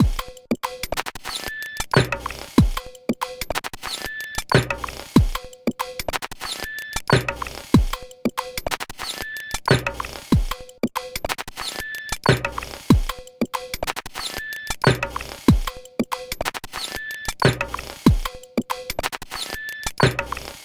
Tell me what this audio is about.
slow metal
loop, minimal, harsh, percussion, beat, techno, industrial